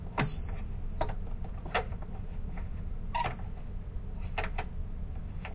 Squeaking Bolt 2
Just squeaking bolt. Nocing special
clang, steel, iron, metal, bolt, metallic, squeak